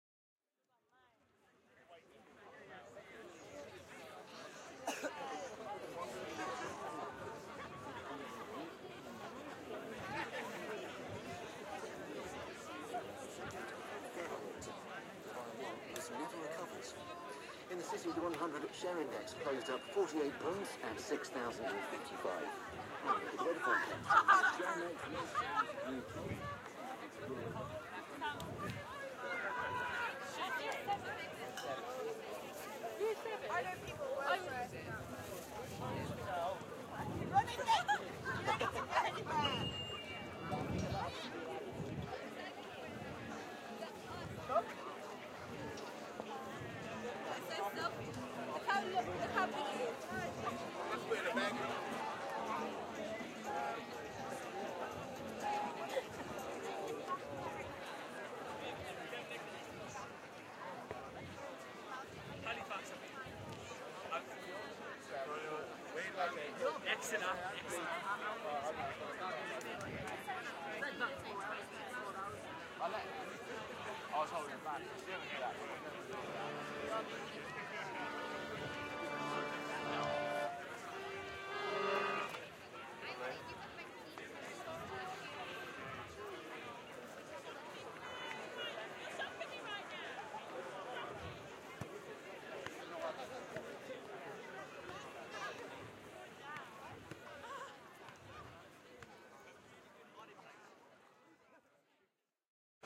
Soundwalk at Bede Park, Leicester 15.05.11
When the sun comes out in Leicester people flock to Bede Park to make the most of it. I just happened to be there with my old Tascam DR-07mk1.
field-recording, leicester, park, soundmap, soundwalk, spring